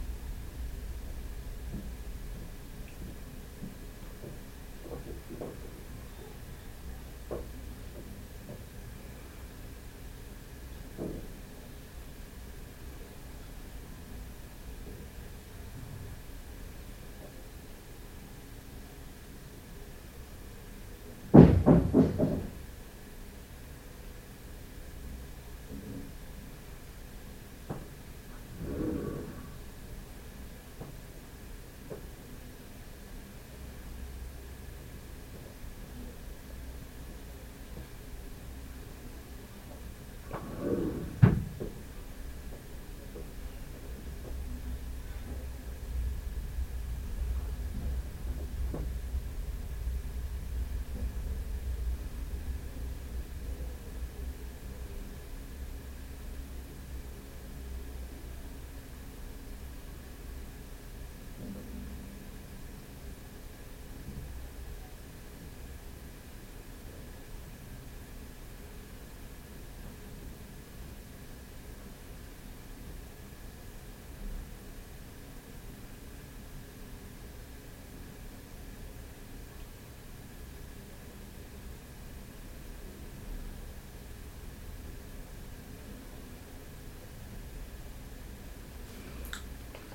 indoors dorm dormitory ambient room tone closed window distant noises neighbours
ambient, noises, tone